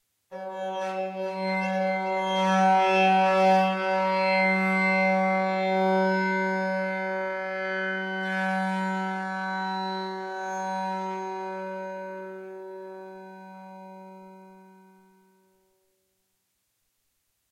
A drone-like sound, made with Absynth.
industrial, spooky, tone, mechanical, moving, drone, eerie